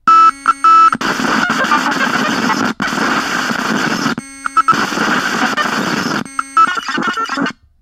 Bend Deluxe
A series of glitches from a circuit bent toy guitar, recorded shortly before the circuit blew.
Circuit-Bending, Circuit-Bent, Glitch, Malfunction